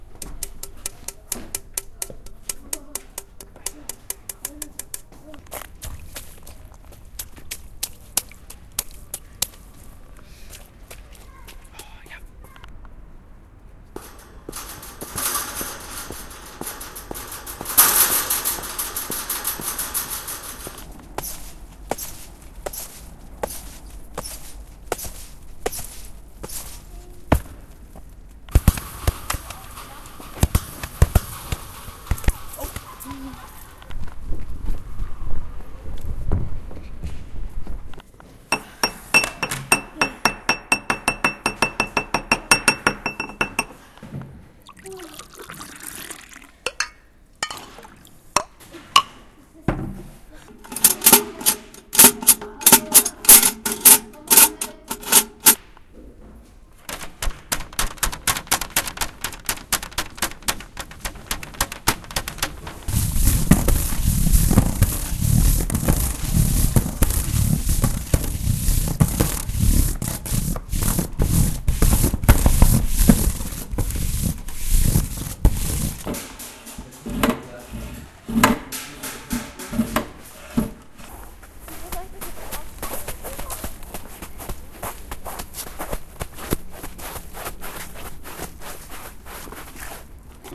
luisa and Johanna
ball, metal, taping